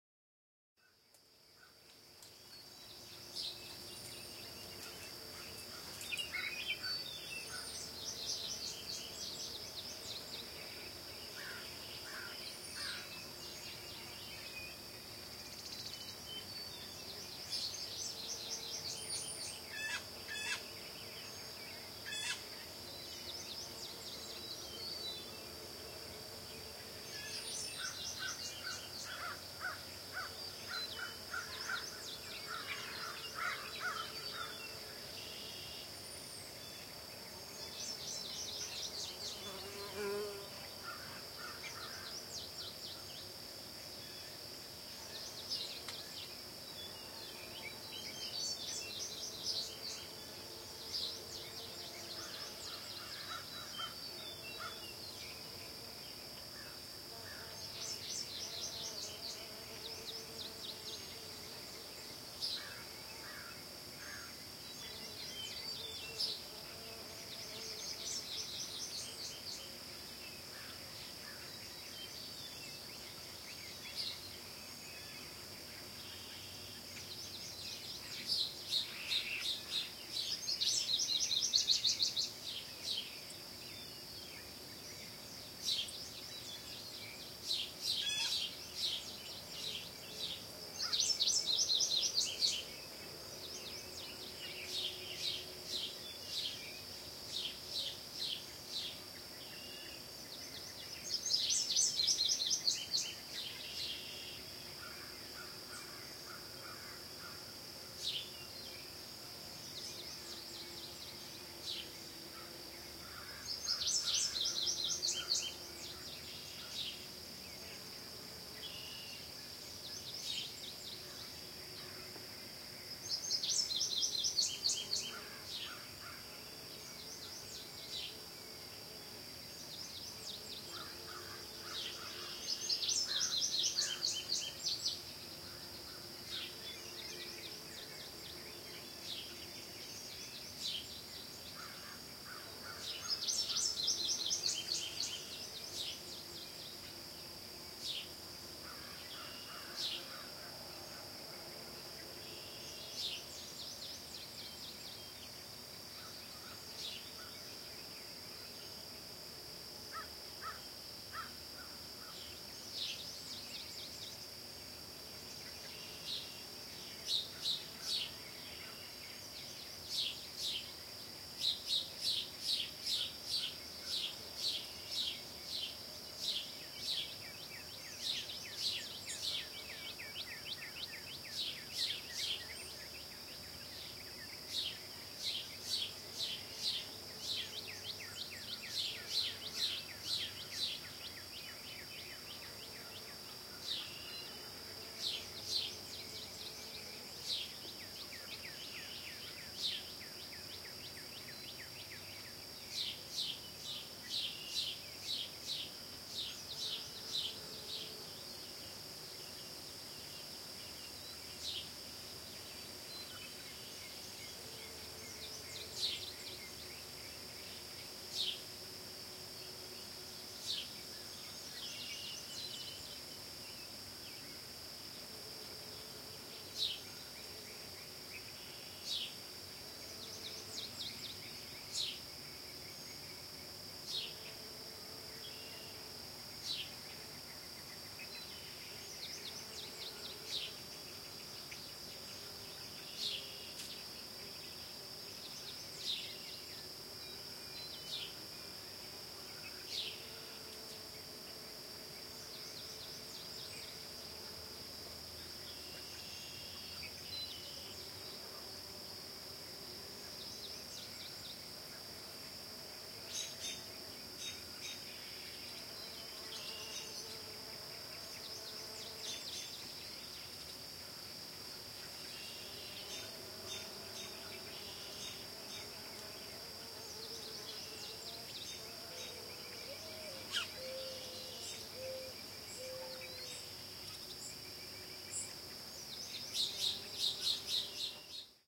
Spring in the South
A symphony of insects, frogs and wild birds punctuate a lazy spring day in south-central Kentucky.
Recorded in stereo with a TASCAM DR-07 MKII.
rural, nature, spring, birds, insects, kentucky, field-recording, country, soothing